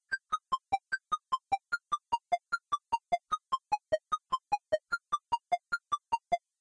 timer first half (loop)
a "different" timer noise.
kinda like on legend of Zelda, after hitting a switch you have to make it through the door before the time runs out.
atari, sega, old, sounds, game, games, console, video, nintendo